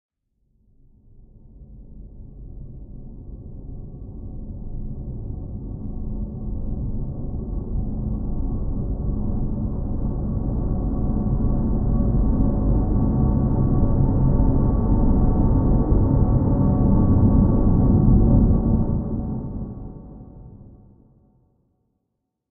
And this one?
Dark Metal Rise 002
Dark Metal Rise Ambient Sound Effect. Created using granular synthesis in Cubase 7.
Cinematic, Dark